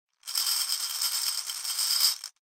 Glass marbles shaken in a small Pyrex bowl. Bright, glassy, grainy sound. Close miked with Rode NT-5s in X-Y configuration. Trimmed, DC removed, and normalized to -6 dB.
glass, marbles, shake, bowl